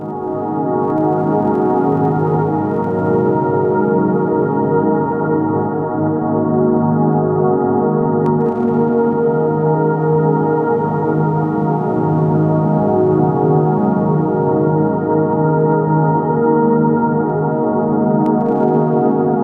One in a series of strange ambient drones and glitches that once upon a time was a Rhodes piano.